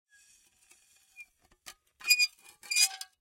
Small glass plates being scraped against each other. Squeaky and scratchy. Close miked with Rode NT-5s in X-Y configuration. Trimmed, DC removed, and normalized to -6 dB.
squeak, scrape, plate, glass, noisy